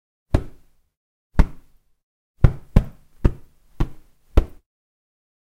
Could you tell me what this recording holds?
Pillow Punch
I simply punched my pillow. Tried to take some of the static noise away. Hope it's useful.
fight, hit, kick, Pillow, Punch